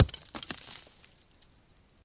rock hits the ground